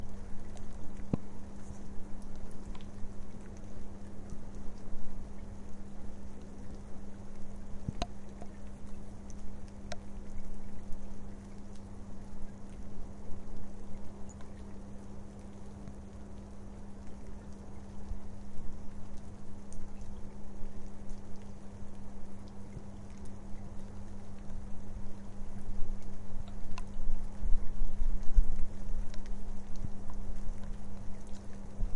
Light Rain Home
Light rain at home. Microphone was indoors sitting on bedroom windowsill
Recorded on an iPhone 4S with a Tascam iM2 Mic using Audioshare App.
AudioShare, iPhone-4s, Rain, Tascam-iM2